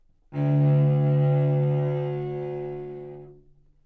Cello - D2 - bad-richness
Part of the Good-sounds dataset of monophonic instrumental sounds.
instrument::cello
note::D
octave::2
midi note::26
good-sounds-id::4539
Intentionally played as an example of bad-richness
D2, neumann-U87, multisample, single-note, cello, good-sounds